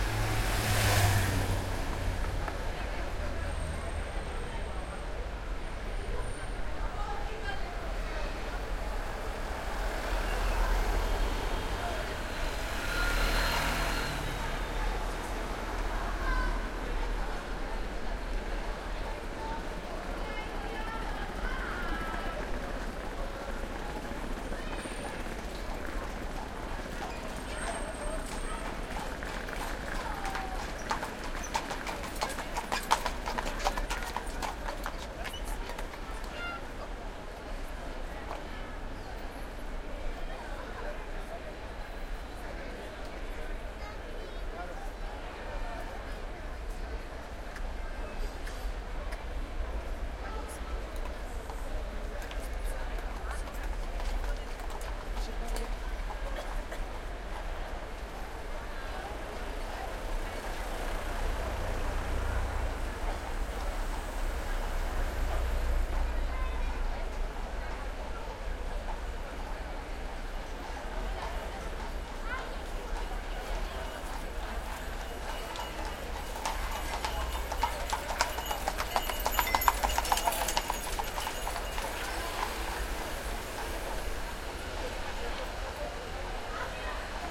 LA RAMBLA street ambience horse and chariot barcelona
This recording is done with the roalnd R-26 on a trip to barcelona chirstmas 2013.
street, cars, people, car, city, f, field-recording, traffic